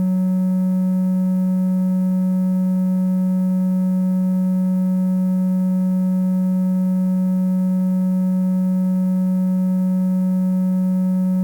Sample of the Doepfer A-110-1 triangle output.
Captured using a RME Babyface and Cubase.